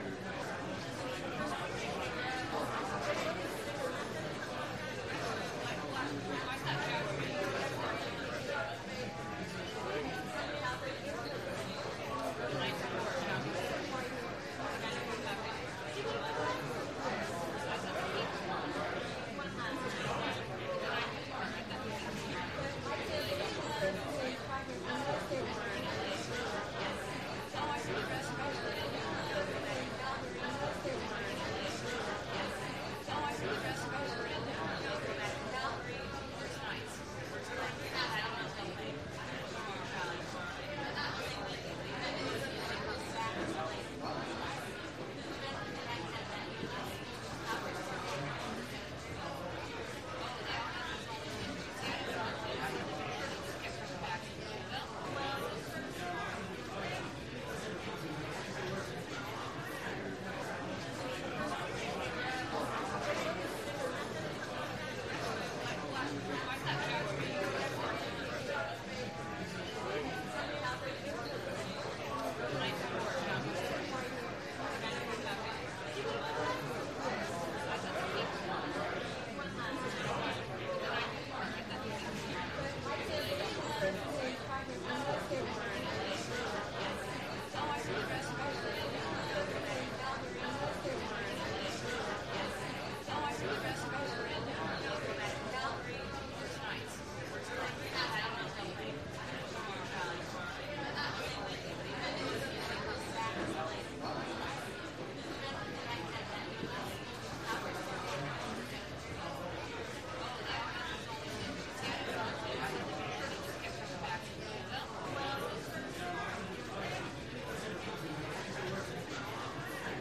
cafe ambience
Recorded with zoom h6 in a cafe